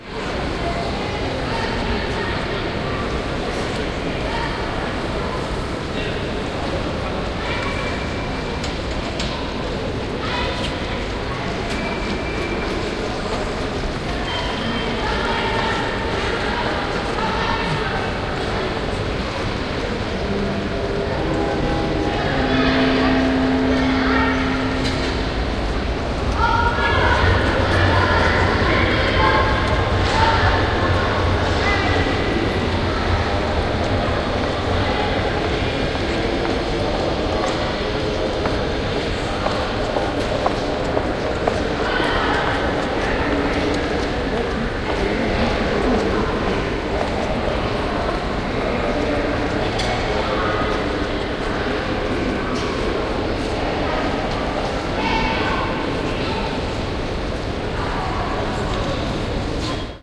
Sounds from a railway station. This is a collage from sounds recorded at a train platform and in the concourse. Recorded on MD with two Sennheiser ME 102 mic capsules worn as binaural microphones.
station, binaural, platform, railway, train